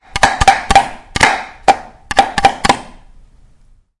mySound TBB Mortada
Sounds from objects that are beloved to the participant pupils at the Toverberg school, Ghent
The source of the sounds has to be guessed, enjoy.
belgium, cityrings, toverberg